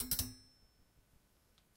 stand lift 3
experimental, metallic